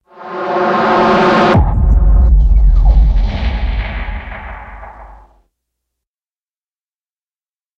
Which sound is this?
rising Hit
creepy, ghost, hit, rise, scary